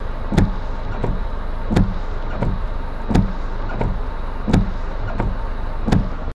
Windshield wipers wiping. Recorded from the outside of the car.
Recorded with Edirol R-1 & Sennheiser e185S.